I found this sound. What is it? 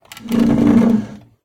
Chair-Stool-Wooden-Dragged-12
The sound of a wooden stool being dragged on a kitchen floor. It may make a good base or sweetener for a monster roar as it has almost a Chewbacca-like sound.
Drag, Monster, Ceramic, Pushed, Snarl, Push, Roar, Kitchen, Wooden, Dragged, Pull, Pulled, Wood, Tile, Stool